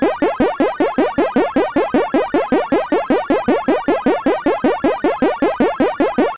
eventsounds3 - PAC!1
This Sound i made half a year ago in Psycle (freeware)
bootup, click, clicks, desktop, effect, event, game, intro, intros, sfx, sound, startup